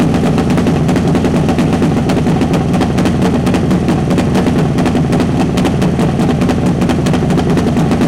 nitro powered drag bike startup
audio ripped from HV40 video using Premiere Pro CS6
taken at Alaska Raceway Park